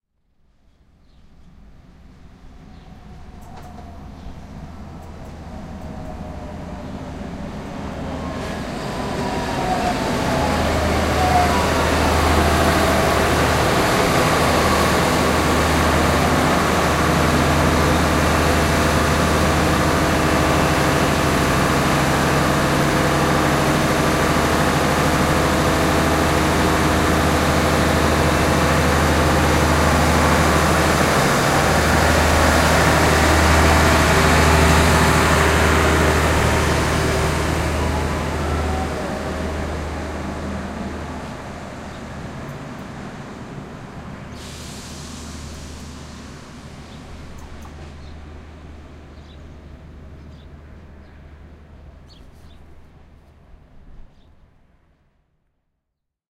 A diesel powered train arrives at an outer-suburban station and departs.